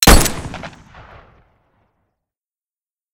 Here's a pistol sound for an upcoming project I'm working on. Hope you enjoy.
1911, CRASHTIMEWARP, Darkscape, firing, gun, military, Noir, pistol, SFSU, shooting, shot, war, weapon